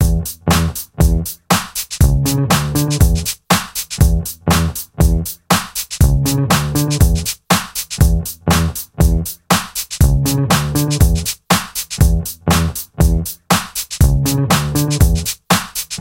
Bass loops 002 with drums short loop 120 bpm
120, 120bpm, bass, beat, bpm, dance, drum, drum-loop, drums, funky, groove, groovy, hip, hop, loop, onlybass, percs, rhythm